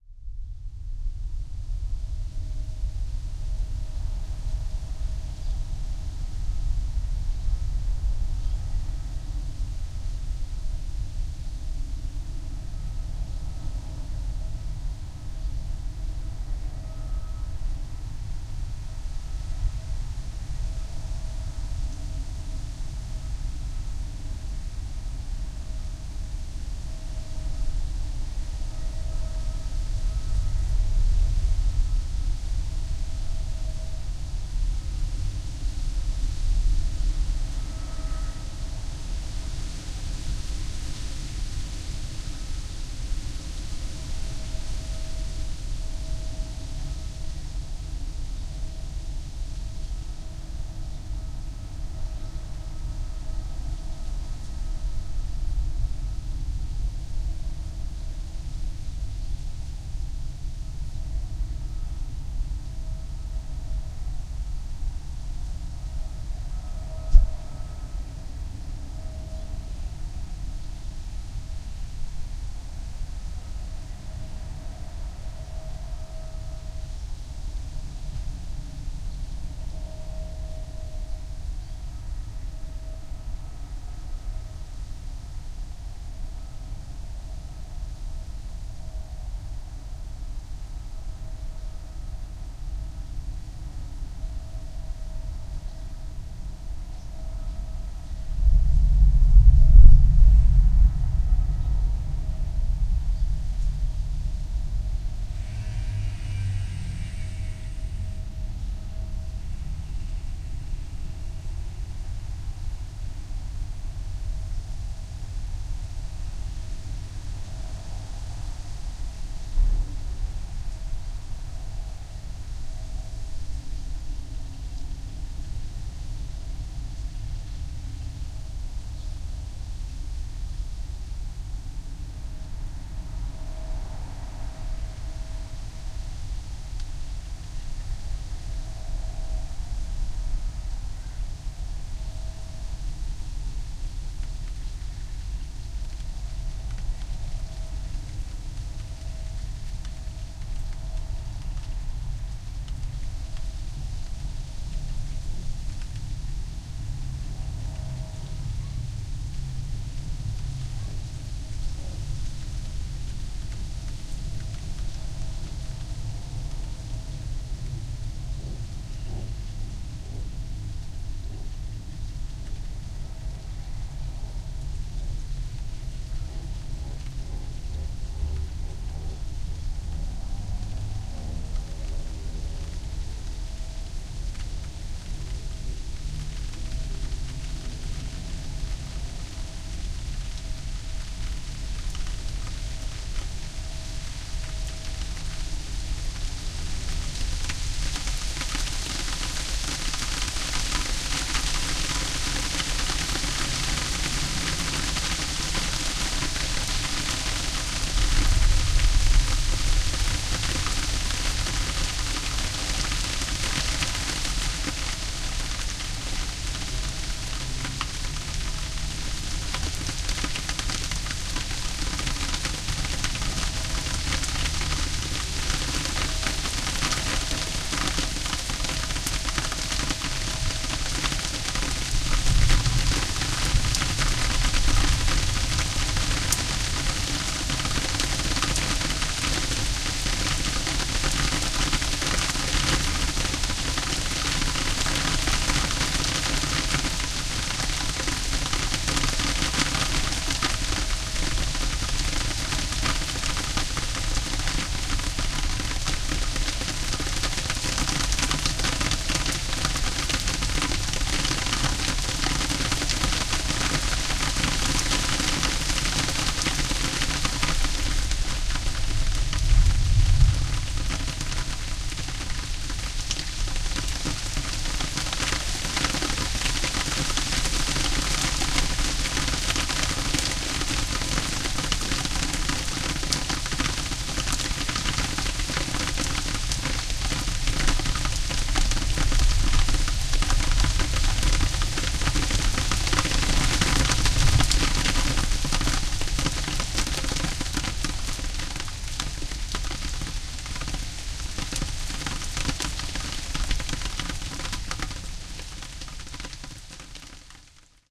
This is a recording of a magnificent thunder, that continued all night long. In the background you can hear the wind blowing in the leafs, in the trees. You can also hear a farmer working in the fields, harvesting. Right after the first big thunder you may notice the distant sound of a scooter driving away. Then suddenly comes the heavy rain and some more thunder. I think this actually is a spooky recording, because the farmers machines gives a very special dark sound. After i recorded this, it got too windy to continue recording.
This was recorded with a TSM PR1 portable digital recorder, with external stereo microphones. Edited in Audacity 1.3.5-beta on Ubuntu 8.04.2 Linux.